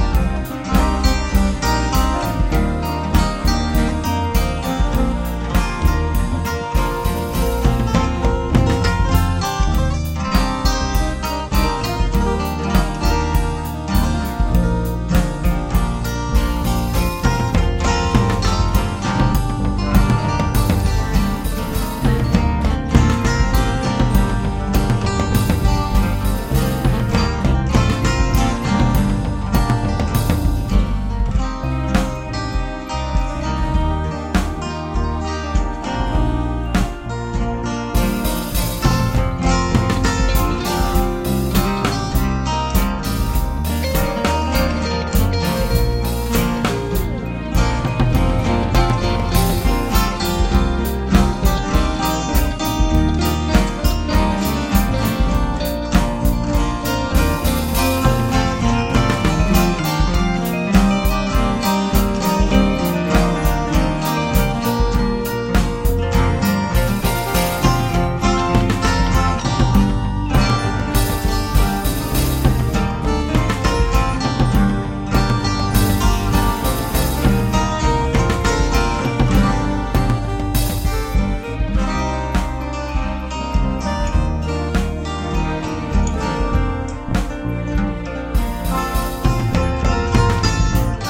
Slow and Easy
This loop was taken from a song that I am currently working on for my baby girl.
acoustic, calm, easy, jazz, listening, mellow, moody, Rock, slow, Soft, soothing